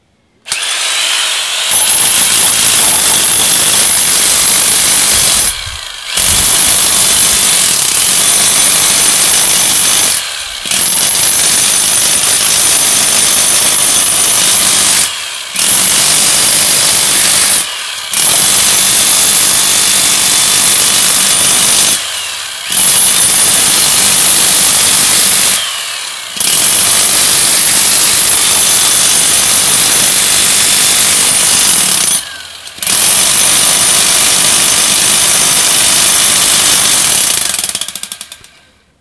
britadeira, ambiência com passaros.